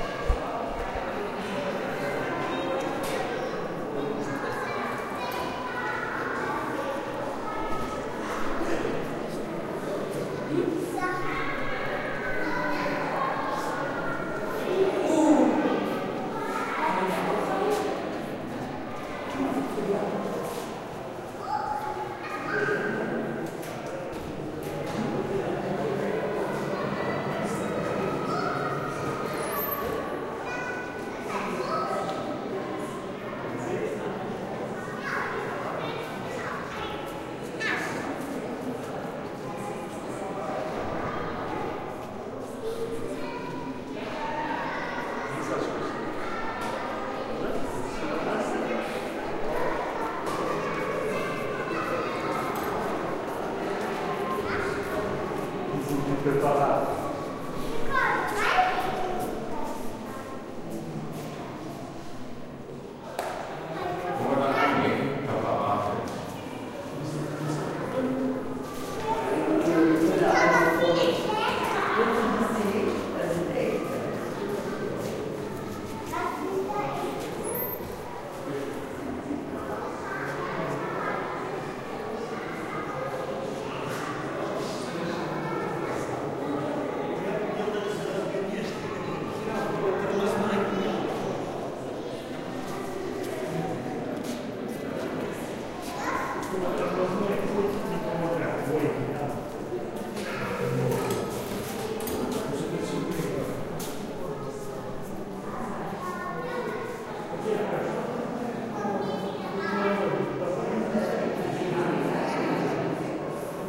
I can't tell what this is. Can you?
DR-100 Naturkunde02
Stereo ambient recording with a Tascam DR-100 Mic's. One of the Exhibition Hall of the Museum für Naturkunde in Berlin. Recorded a Sunday Afternoon.
germany, naturkunde, berlin, museum, ambient, stereo, dr100